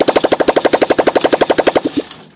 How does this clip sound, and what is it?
Bubbly Engine Stopping
Stopping the engine of an old bubbly sounding scooter.
engine, stopping, bubbly